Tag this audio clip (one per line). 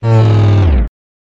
Boom
Charang
Fall
Synth